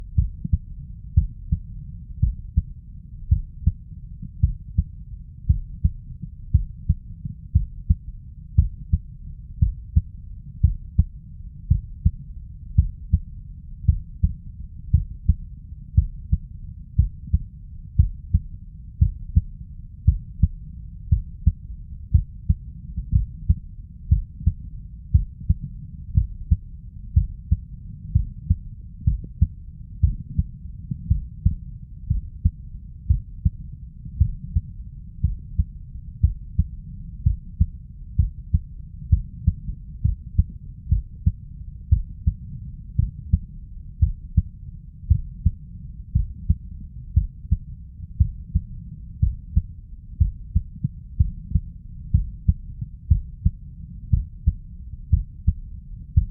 SW002 Stethoscope Chest Heart Heartbeat Clean At Heart
A stethoscopic recording of the human heart, picked from our sound collection on the sounds of the human body, available here:
beating, blood, body, bodysound, heart, heart-beat, heartbeat, heart-sound, human, man, organs, sound, stethoscope, stethoscopic